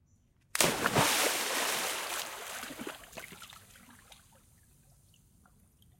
splash body dive into water pool
recorded with Sony PCM-D50, Tascam DAP1 DAT with AT835 stereo mic, or Zoom H2
dive, body, water, into, pool, splash